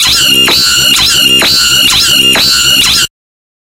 Cat sound wavetable in Xfer Serum with filters and LFOs